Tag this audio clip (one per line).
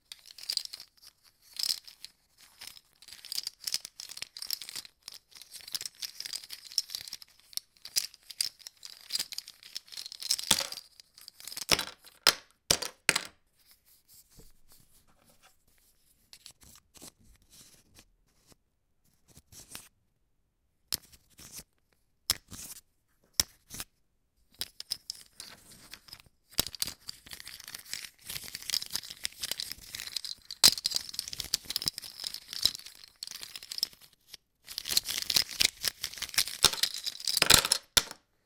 grinding
texture
synthetic
material
plastic
shaking